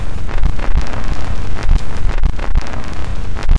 grungy rhythmic loop; made in Adobe Audition